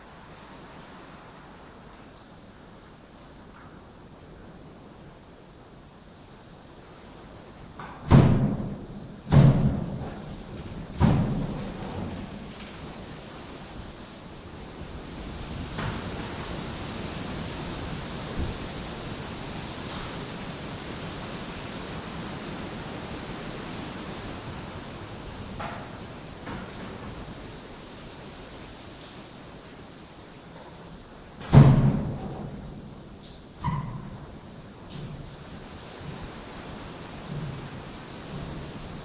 Tapping the door on the wind
Horror sound of tapping and clanging doors at the wind. Can be useful for any horror movie.
abstract, ambient, art, atmospheric, clanging, dark, deep, disturbing, drama, dramatic, fear, ghosts, haunting, horror, mystery, open, patter, scary, sinister, slap, suspense, tapping, tension, terror, threat, wind